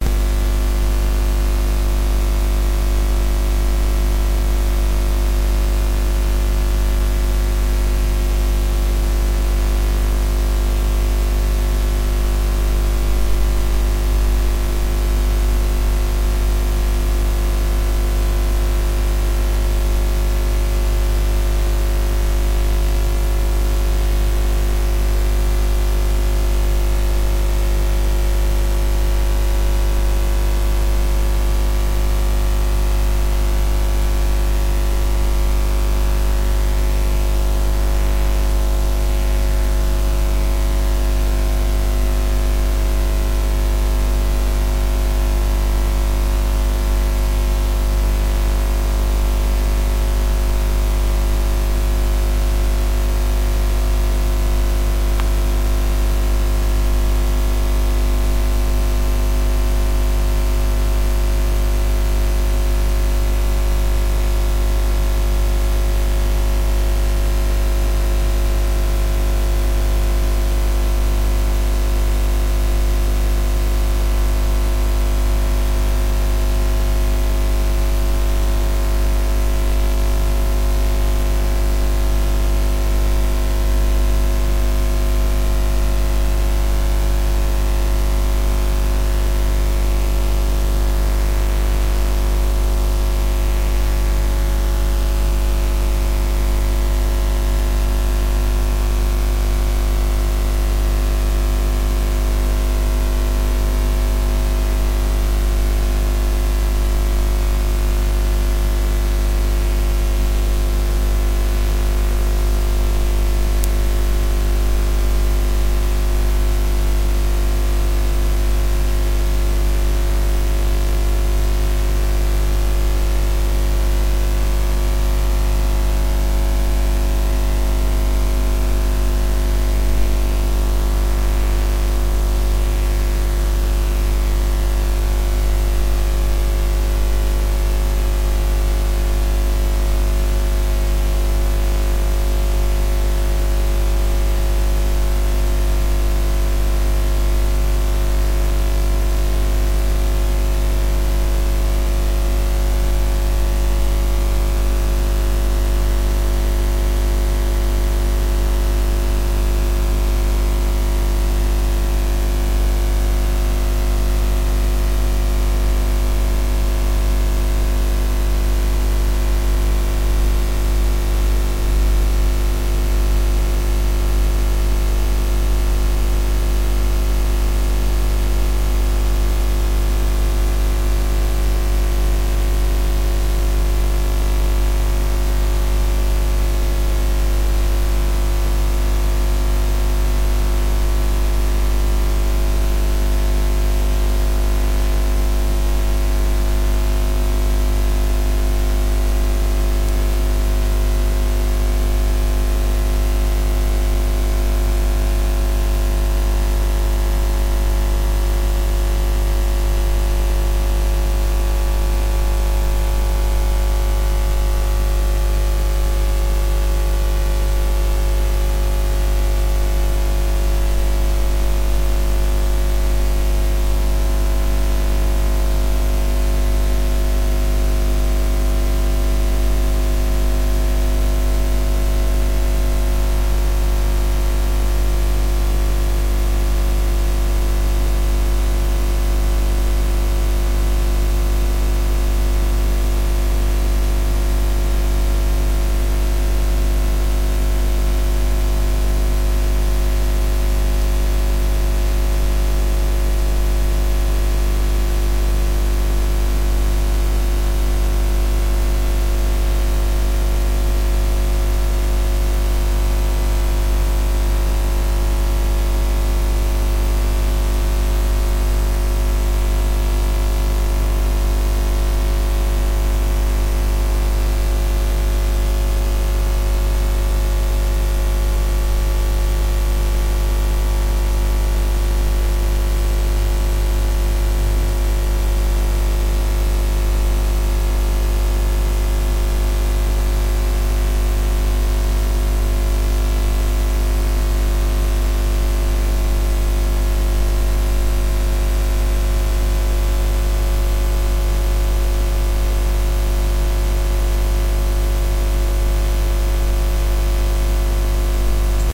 19-Canal d'Energie (Métabolisme+Duodénome)+
Concept, Empathie, ologie, Rh